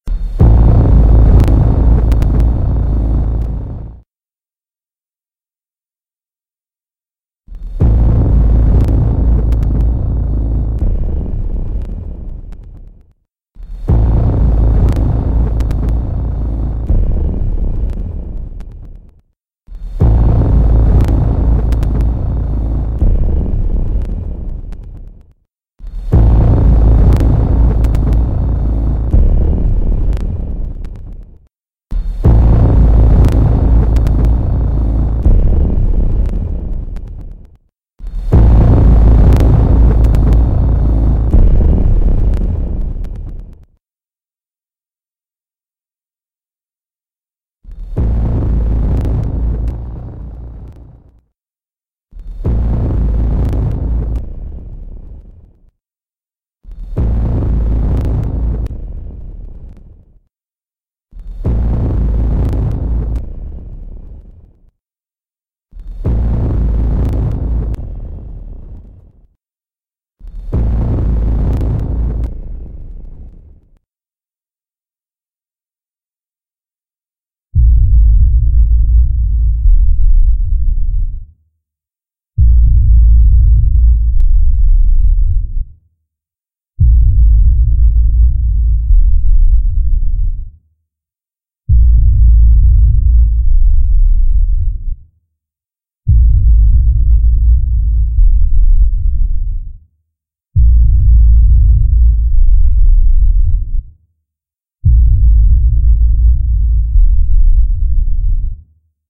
Tension Riser - Steady Bass Rumble Suspense Builder
Sound Effect - Bass Rumble Tension Rise (Wind River Movie)
I've looked for this particular bass tension builder sound effect from the movie Wind River since it came out!! If you've seen that film and you're familiar with the "Standoff" scene, then you'll probably know what sound effect Im referring to... In the background of that most intense ever scene of all time is this bass rumble or tension builder that gives me goosebumps every time I watch it! I've Wanted the original pretty bad because its the best suspense builder(bass rumble) sound Ive ever heard! Although it doesn't seem to exist online even for purchase.
So I tried to recreate it myself! Im a movie scene editor all the way, but im less than amature at audio editing, so please excuse the flaws. All with different tones, speed, and other details trying to recreate as best I could.